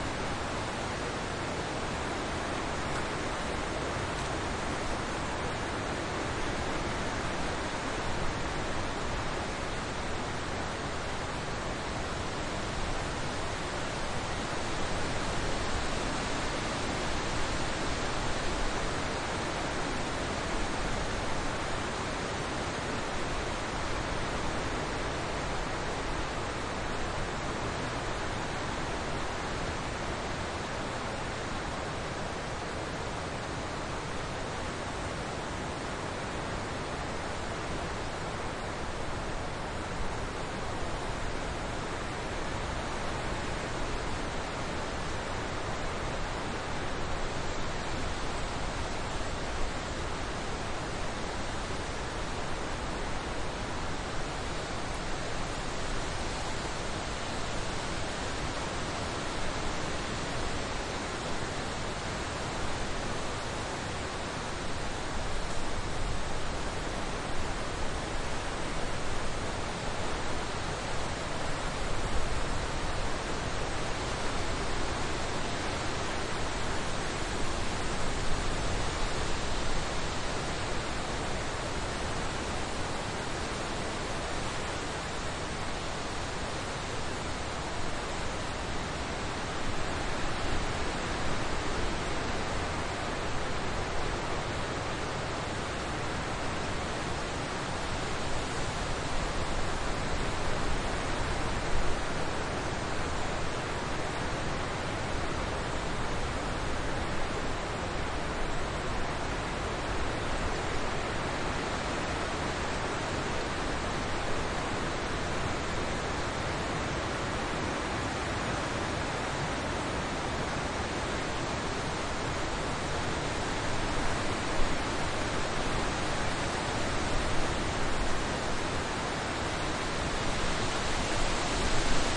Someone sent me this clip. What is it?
Wind open space forrest
Moderate to high wind in a forrest recorded in a open space. Recorded by Soundfield SPS200 microphone, with a Sonosax SX R4 recorder, and decoded to blumlein with Harpex X
ambisonic, blumlein, forrest, harpex, open-space, Sonosax, Soundfield, sps200, tree, Wind